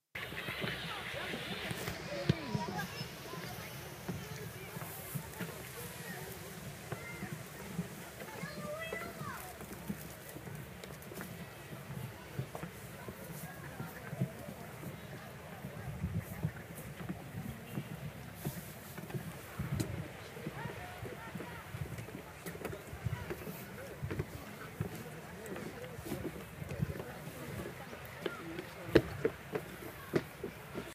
i went to a zoo and recorded a few things